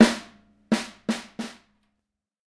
snare - Premier Artist Maple - loose - hit x3
Snare drum hits. Premier Artist Maple snare 14x5.5.
drum, maple, premier, snare, loose